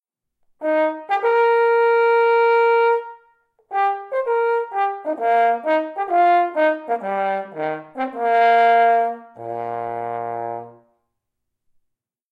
The opening fanfare to Strauss' First Horn Concerto in E-flat. Recorded with a Zoom h4n placed about a metre behind the bell.